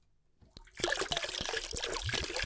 Dropping Water v1
Just someone dropping water into water